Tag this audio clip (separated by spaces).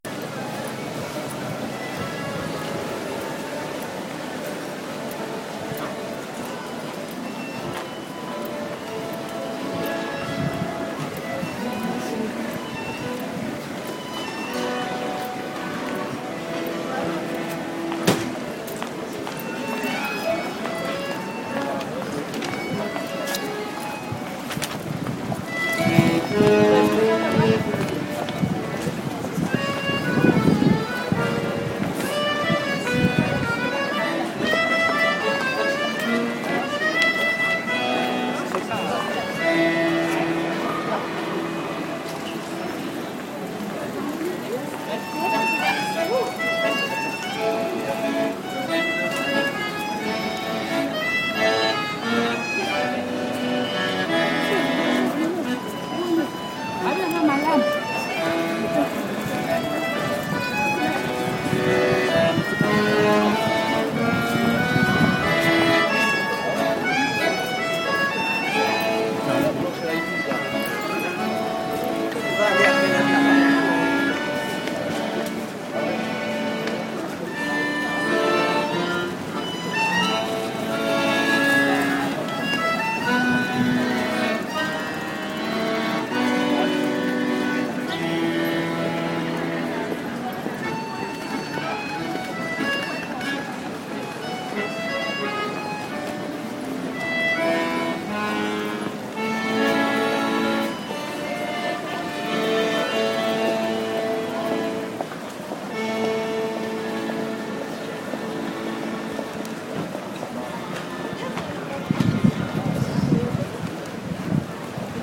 field-recording Accordion city Paris street-music